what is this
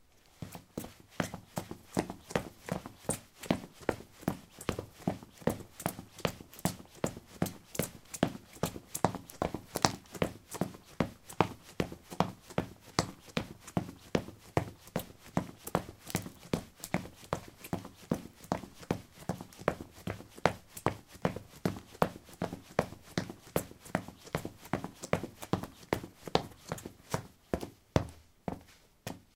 concrete 12c squeakysportshoes run
Running on concrete: squeaky sport shoes. Recorded with a ZOOM H2 in a basement of a house, normalized with Audacity.